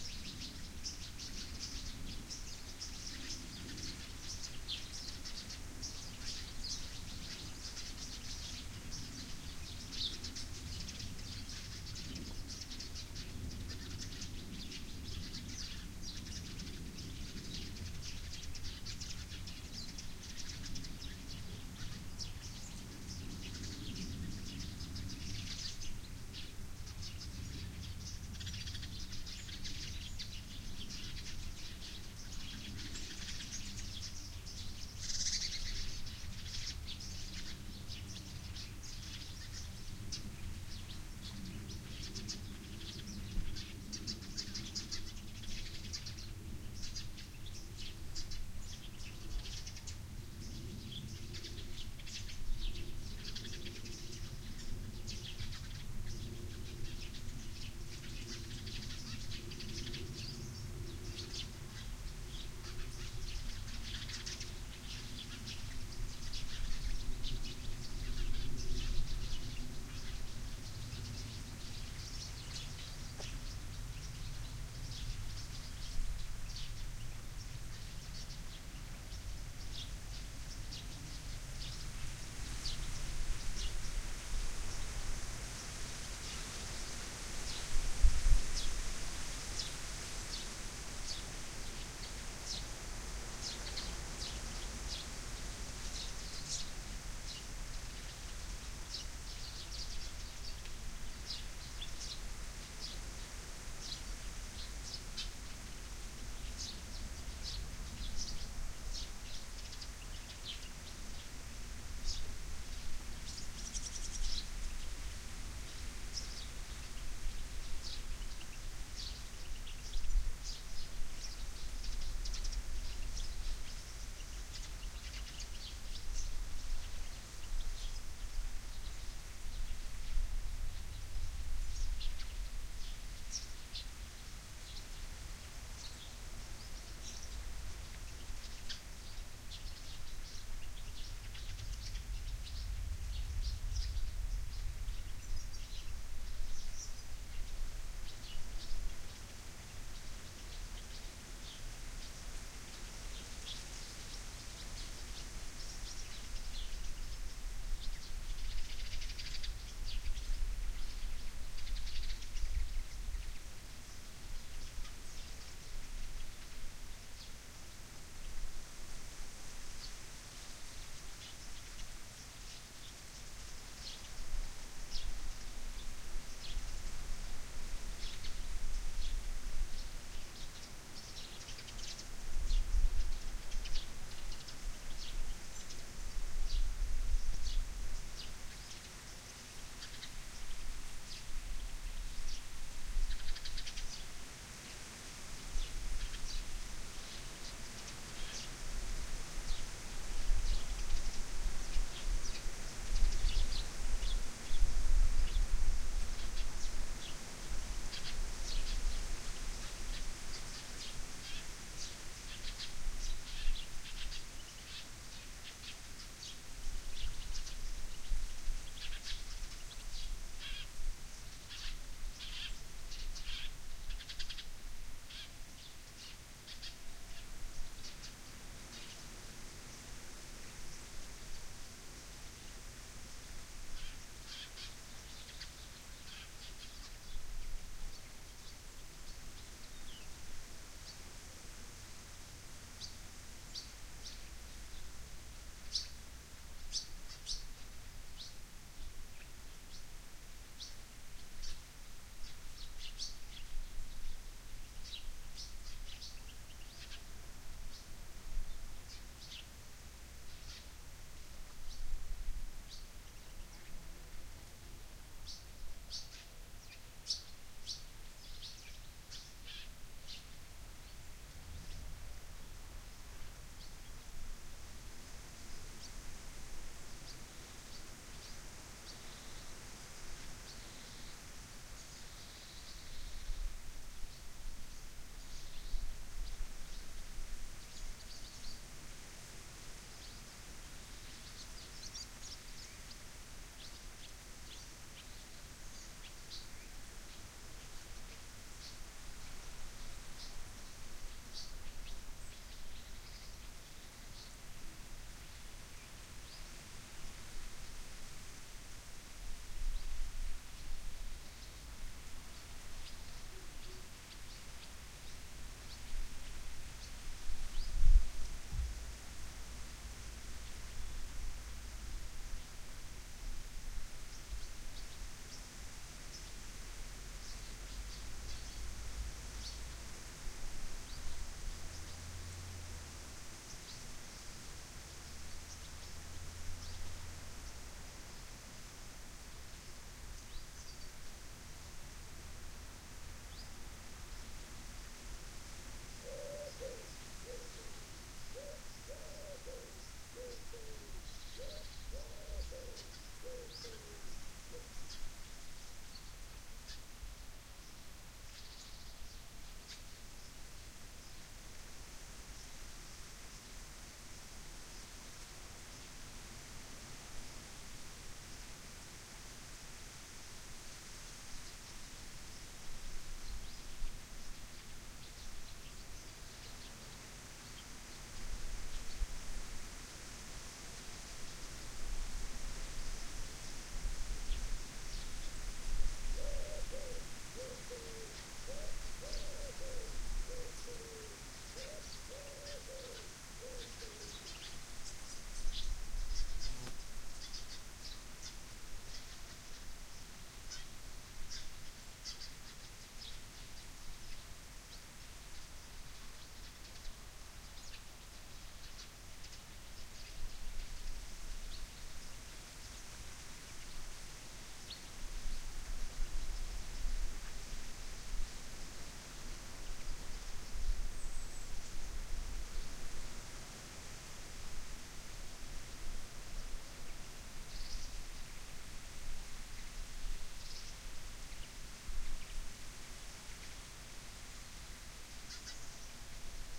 Recording of birds in the morning at about 7.00 am, in my garden. It was a little windy a some points, but that's the wonderful nature for you. Every once in a while you can hear some cocooing. Forgive me, but i don't really know enough about birds to tag them.
This was recorded with a TSM PR1 portable digital recorder, with external stereo microphones.